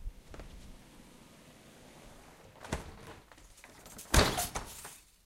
Closing a large drawer
chaotic, clatter, crash, objects